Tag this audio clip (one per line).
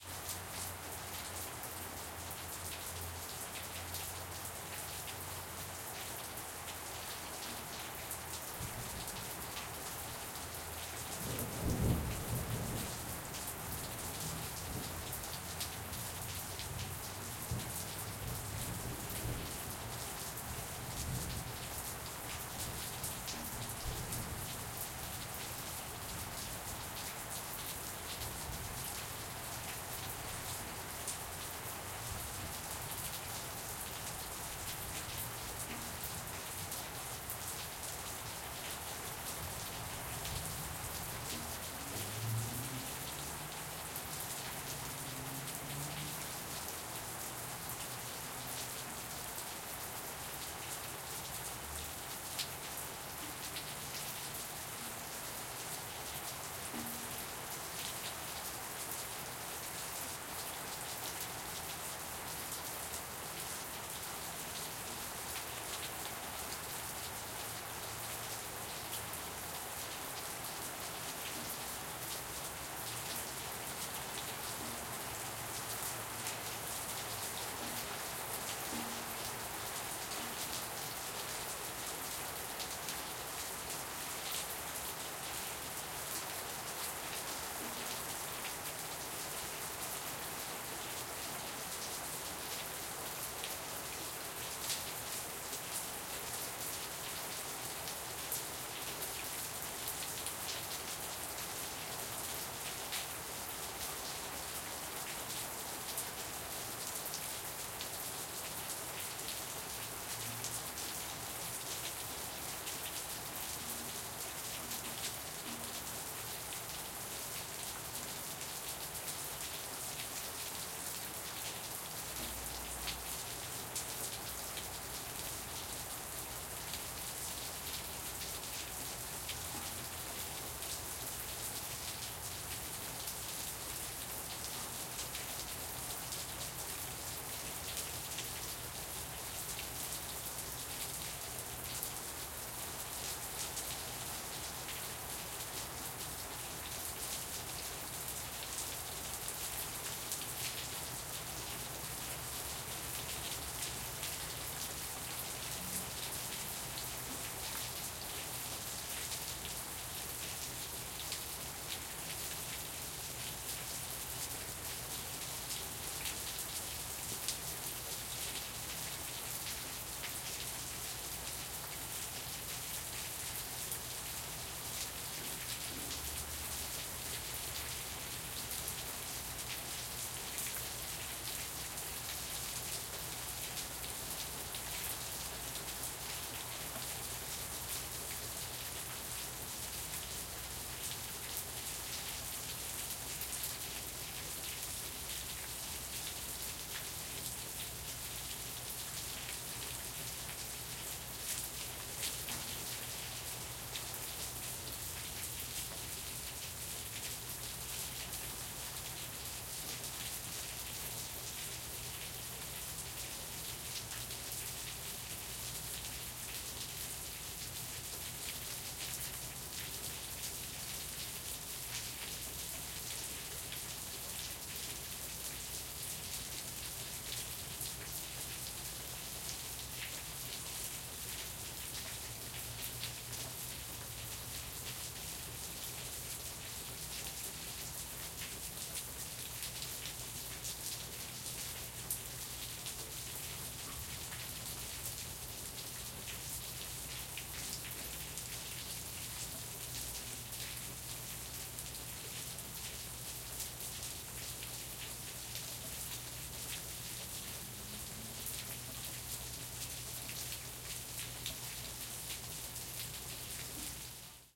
atmosphere
quad
exterior
ambience
background
rain